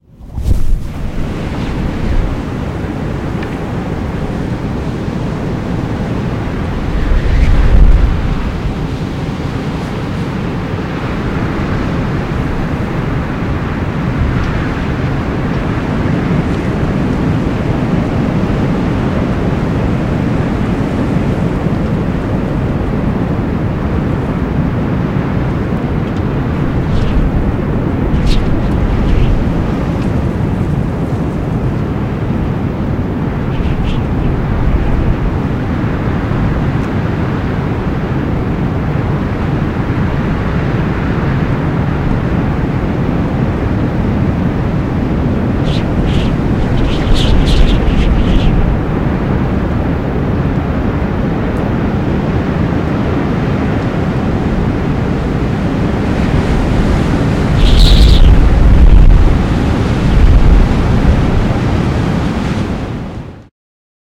Very windy ambience
loud, wind, windy